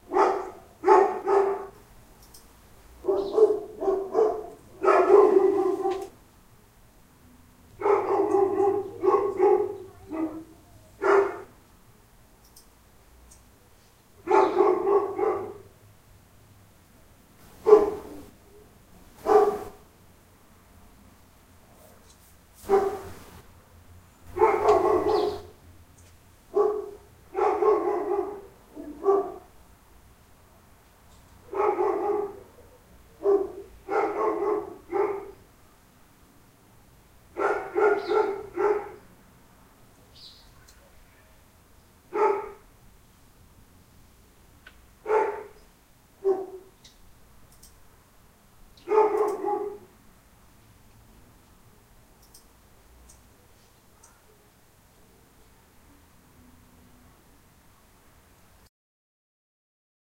Recording of our neighbor's barking dog across the street. Recorded in San Diego, 2016.